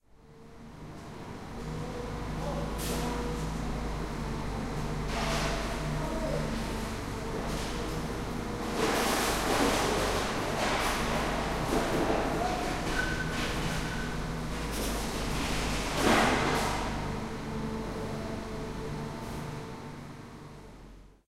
At the punt de reciclatge on Ramon Turro in Barcleona. Standing outside the warehouse door recording the muffled sound of the lads sorting the waste.
Recorded on a Tascam Dr-2D.
Campus-Gutenberg, Dr-2D, Engineering, Tascam, ecological, industial, machines, recycling, technology